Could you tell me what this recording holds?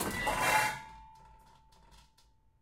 pots n pans 11
pots and pans banging around in a kitchen
recorded on 10 September 2009 using a Zoom H4 recorder
kitchen, pans, pots, rummaging